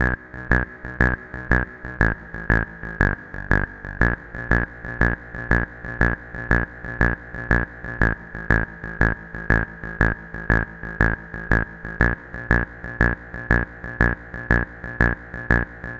This is the intro-bassline that you need, if you wanna create or mix the electro-track "Happy Siren". This bassline fits to the next two Synths!!!
minimal, synth, bpm, electro-house, delay, 120, electro, bassline, house